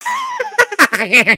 A very mischievous laugh.
Creepy-Laugh, Happy-Laugh, Laugh, Laughing, Mischievous, Voice, Wheeze